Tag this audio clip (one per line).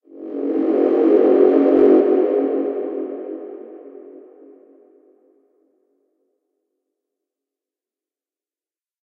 1500-AD
battle
foghorn
horn
leave-battle
solders
war